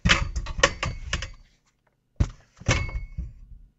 awfulTHEaudio runterfallen 04
2 things falling on an carpet ground touching an metal chair, taken with AKGc4000b
thing, metal, carpet, ground, falling-down